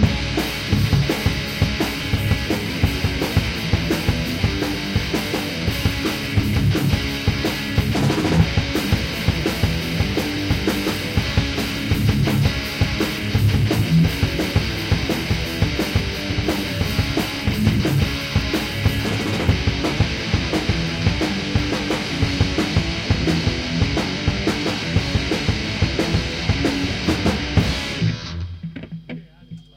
Metal Band Jam 6 Midtempo Hardcore
2 electric guitarists and one drummer jam metal and hardcore.
Recorded with Sony TCD D10 PRO II & 2 x Sennheiser MD21U.
heavy, guitars, drums, band, electric, metal, rock, punk, instrumental, drum, guitar, hardcore, jam